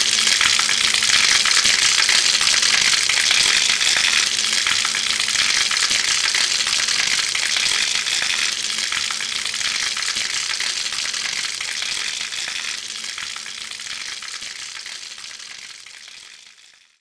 ARTRU Maxime 2013 2014 Lapping
sound's record from university lyon 3
- adjusted to 3 seconds
- loop
- Melted in closure
Analyse morphologique :
Son cannelés
Timbre éclantant
Grain rugueux
pas de vibrato
attaque seche
variation serpentine